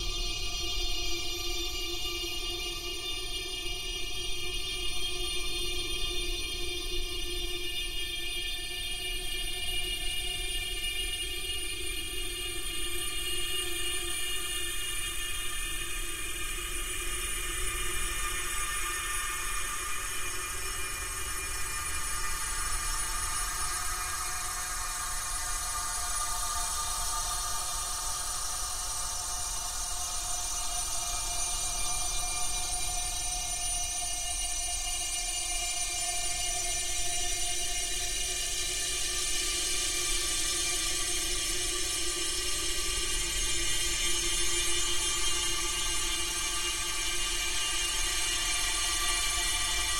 A high pitched computer glitch sound processed in Audacity (v. 2.3.3) using a little decay, some reverb, and a four-stage phaser before strecthing the whole thing to create an eerie sound
Soundscape, Computer, Ambience, Glitch, Technology, Eerie